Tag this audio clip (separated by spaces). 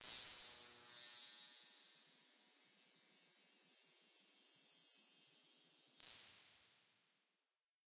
machine reaktor ambient multisample industrial